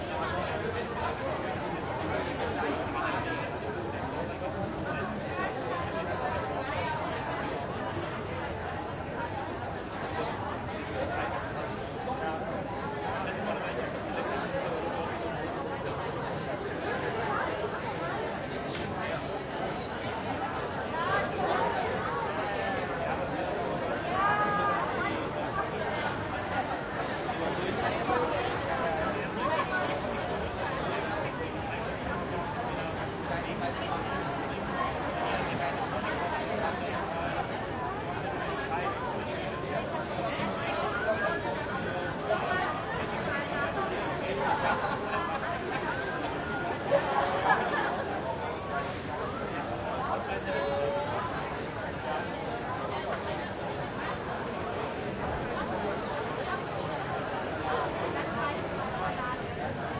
Street Cafe very busy no traffic - Stereo Ambience
Street cafe very busy, summer in the city,
ambiance, ambience, ambient, atmo, atmos, background, background-sound, field-recording, stereo